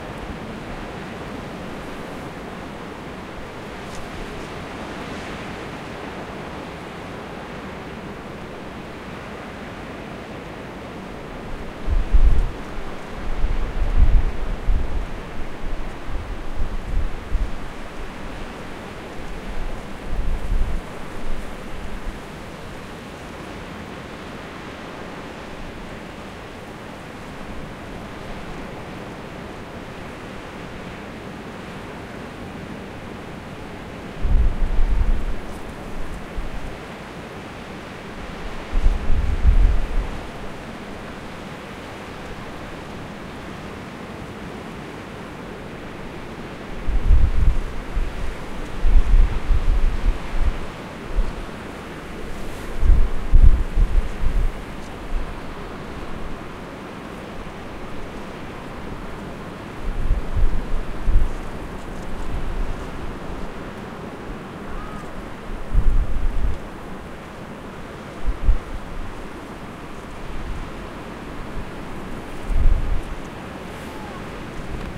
Summer Beach Storm (2)
Ambient recording of waves breaking on the beach at Bournemouth, UK during storm August 2021. Stereo recording 120degrees separation which produces quite a lot of wind noise. Recorded on Zoom H4n Pro using the built in mics.
beach, Bournemouth, cliff, coast, field-recording, ocean, sea, storm, surf, United-Kingdom, waves, wind